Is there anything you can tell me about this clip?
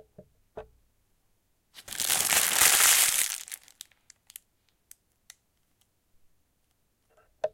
Paper Crumple (Short)
Short paper (baker bag) crumple sound I recorded with a H4N.
bakery, trash, bread, bun, crumple, paper, h4n